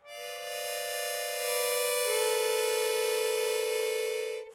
Chromatic Harmonica 21
A chromatic harmonica recorded in mono with my AKG C214 on my stairs.
chromatic, harmonica